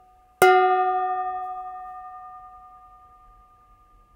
pan hit2
hit a pan
pan, hit, kitchen